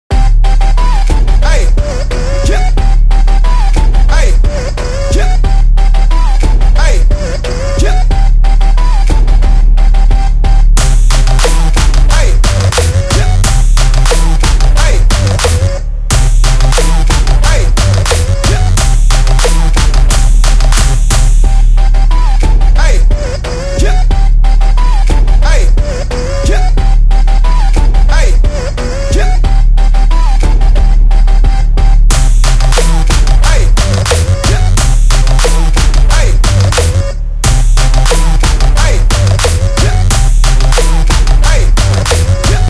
Hip-hop 165 bpm
This track was made in FL studio DAW, hopefull, rythmical, punping music with some voice chants. It will be good for some comedy episodes, winning fragmrnts.
165, bpm, Hip-hop, loop, pumping, track